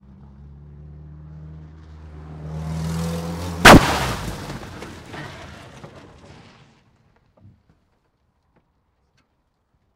Car Crash EXT
Actual recording, of an actual Peugeot 406 hitting an actual Ford KA.
Exterior, boom mic.
Sehnheiser MKH416 > Sound Devices 788t
crash, accident, car, collision